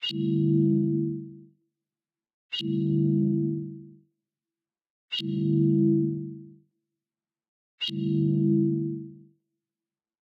UI 6-18 Confusion blip(TmStrtch,multiprocessing)
Sounding commands, select, actions, alarms, confirmations, etc. Perhaps it will be useful for you. Enjoy it. If it does not bother you, share links to your work where this sound was used.
screen, beep, confirm, menu, blip, film, application, fx, select, sfx, signal, alert, switch, computer, GUI, interface, bleep, typing, effect, click, game, alarm, option, gadjet, cinematic, keystroke, button, command, UI